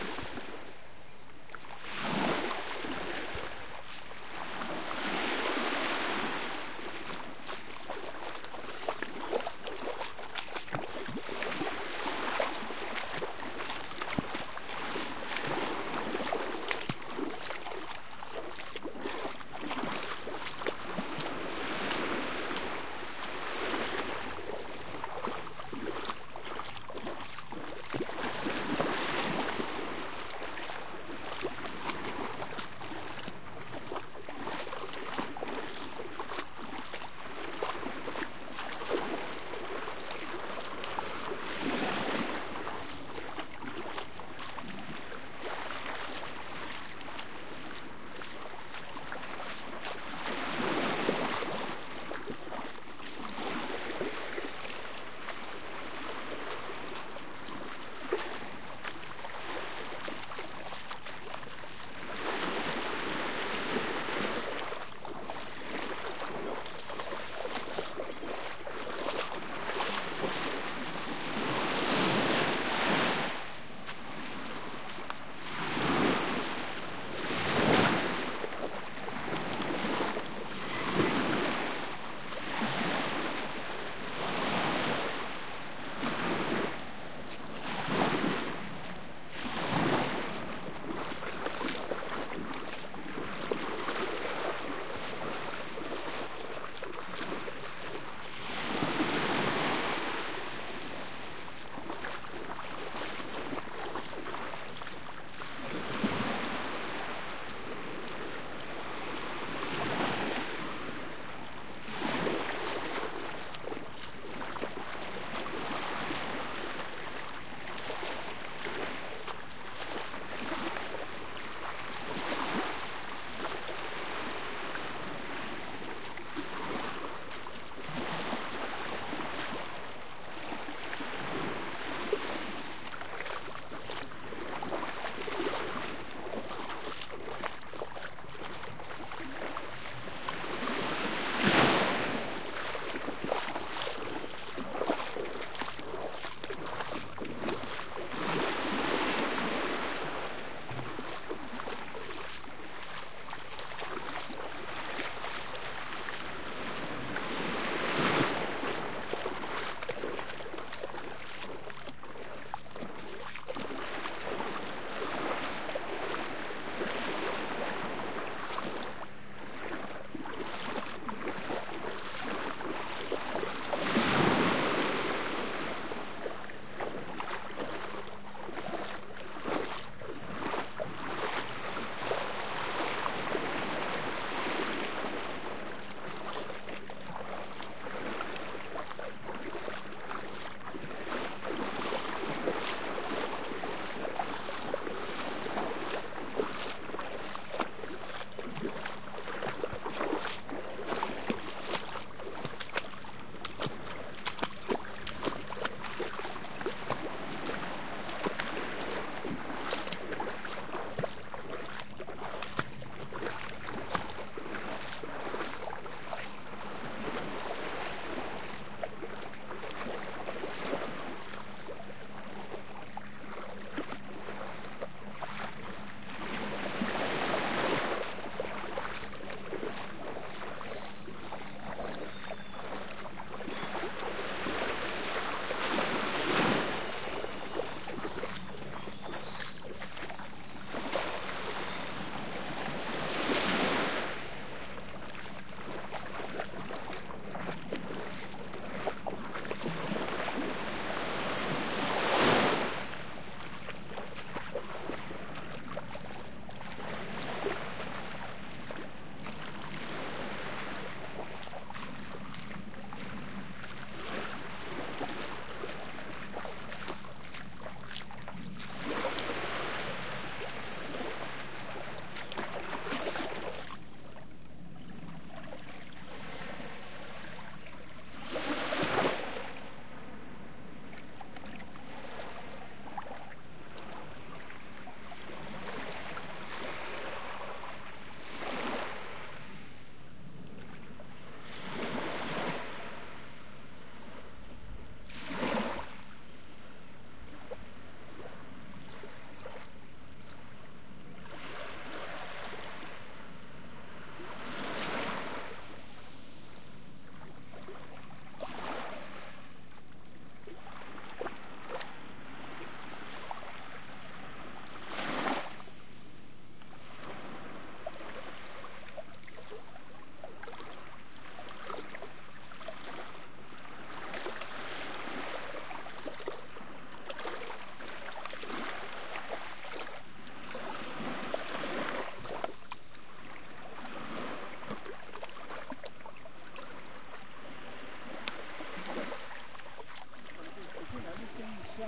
beach long02
Recording taken in November 2011, at a beach in Ilha Grande, Rio de Janeiro, Brazil. Recorded with a Zoom H4n portable recorder.
Sounds of walking and splashing on the water. Maybe some of the splashes can pass off as someone swimming...
brazil, splash, rio-de-janeiro, swimming, ilha-grande, splashes, water, waves, swim, sea, field-recording, beach